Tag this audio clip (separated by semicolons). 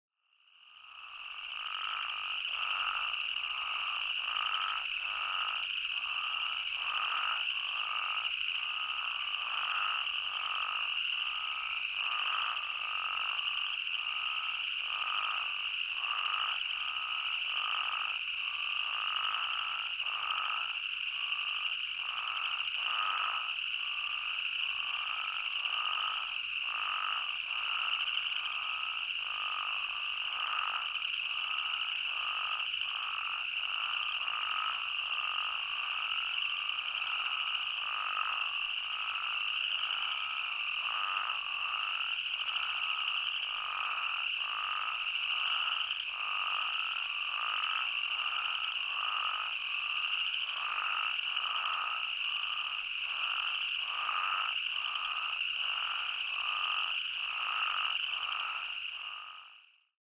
uncommon-spadefoot-toads; field-recording; animal; frogs; spadefoot-toad; toad; nature; call; national-park-service